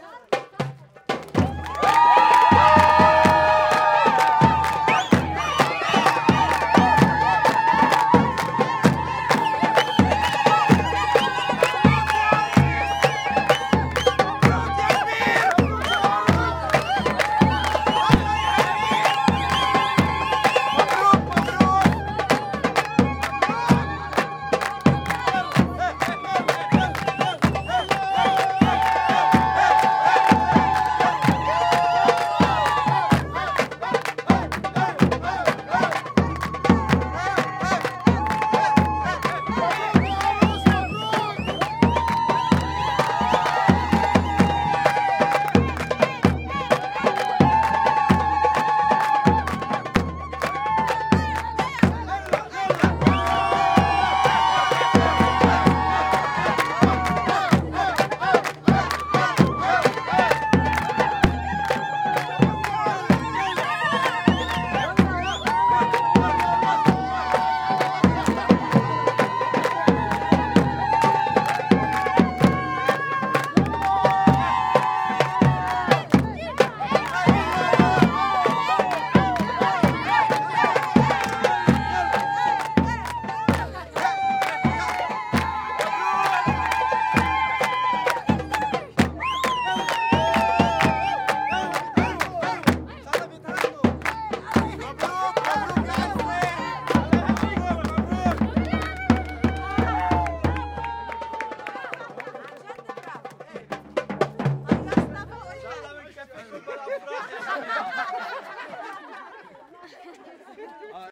LEBANESE WEDDING Zaffeh - Haysa
Typical celebration of a Lebanese wedding